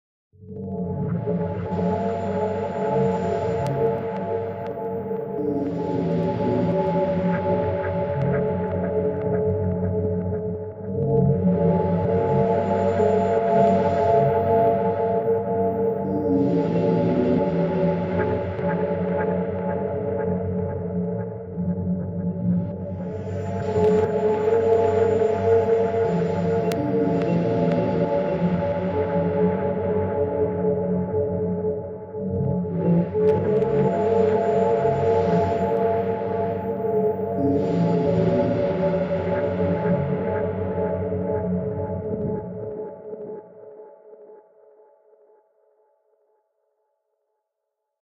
I have recently built a granular sampler in PureData and have been using various samples in it and creating cool patches with it. this is one of the more technical patches.